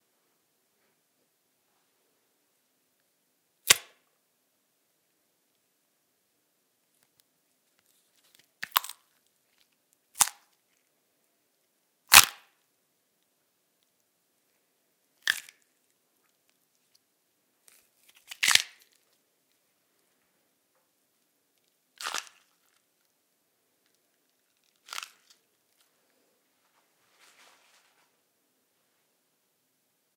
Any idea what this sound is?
Bones Breaking 2
The sounds of bones breaking.